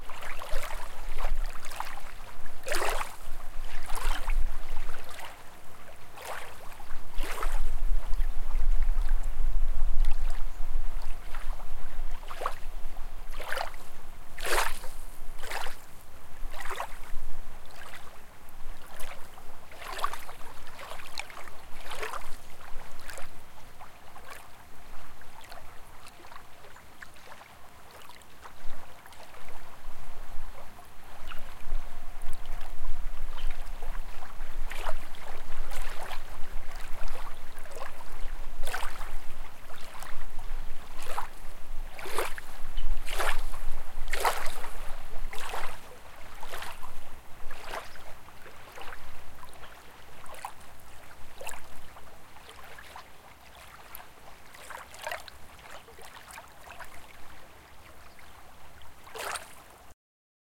Waves sound effects on the lake in summer time realized with Tascam DR-40X